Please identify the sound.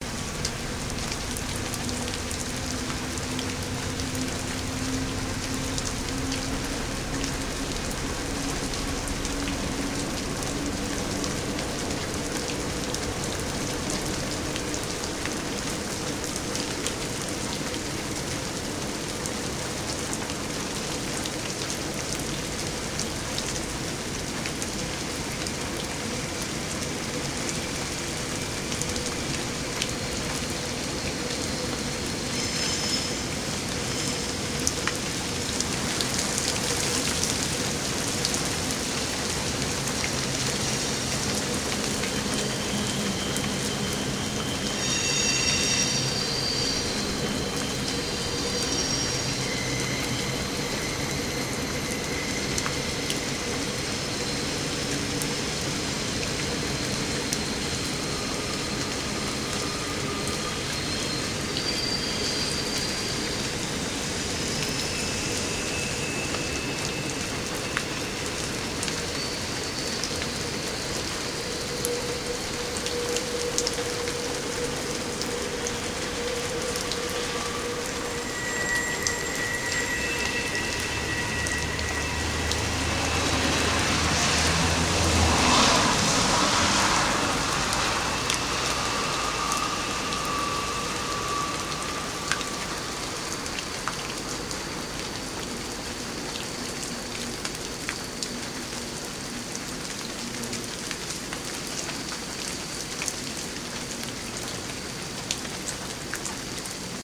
Train Going Past In The Rain
I recorded rain with a train and eventually a car going past from my window with a Shure Super 55 (the only mic I have) so this recording is in mono until I get a stereo pair.
ambiance, ambient, car, field-recording, mono, nature, rain, sounds, train